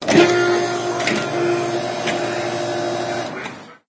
old grocery store door
The other day I was walking out of a grocery store and realized I had not heard the sound of the door for many years. It was one of those mat-triggered doors that kind of whines when it opens. So, I went back with my high-tech recording instrument (iPhone) and captured it for posterity.
automatic-door, grocery-store, old